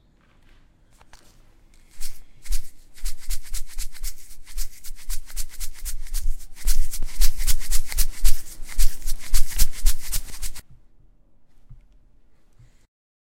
Took a jar of seasoning and shook it in random orders.